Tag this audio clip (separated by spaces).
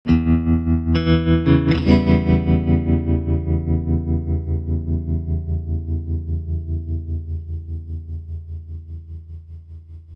twang guitar filmnoir tremolo